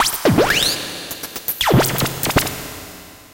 ELECTRIBE SQUELTCH 1
A deep, squeltchy sound I made on my Korg Electribe SX. it's a drum sample run through various fx.